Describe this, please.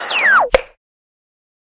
shutting off analog radio 2
An effect I put together with a oscilloscope sound borrowed from Urupin (210521) and snapping my fingers played back at 25% speed.
analog, electronic, radio, shortwave